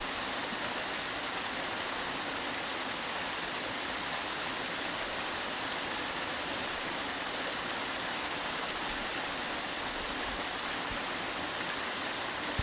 water flow nature
Sound of river flow with aditional nature sounds recorded by Zoom H1
nature, river, stream, water